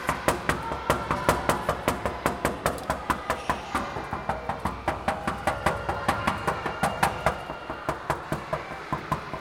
SonicSnap JPPT6 Wall
Sounds recorded at Colégio João Paulo II school, Braga, Portugal.